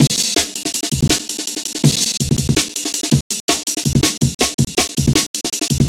skipping rocks.R
studdering amen loop created in pro tools....
amen, brother, chopped, drum-and-bass, drums, jungle, loop, loops, twisted, winstons